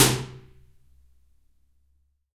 i just recorded some IRs of different rooms of my appartement with a sine sweep and that tool of voxengo